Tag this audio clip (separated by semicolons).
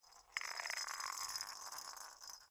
pan; floor; rakes; ball